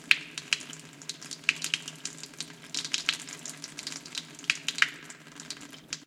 oil boiling
Soul Digger 🎼🎶
bubbling, burn, burning, field-recording, fry, frying, oil, purist, sizzle